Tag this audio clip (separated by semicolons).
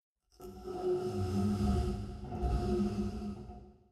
ambient; chorus; low; metal; tightrope; wire